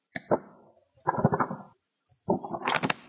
Turning Magazine Pages (Dergi sayfa çevirme)
Paper sound of turning magazine pages. Recorded & cleared background the sound.